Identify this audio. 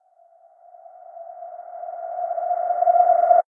Eerie Moment
I reversed it and then I added some more bass and treble.
Spooky, Creepy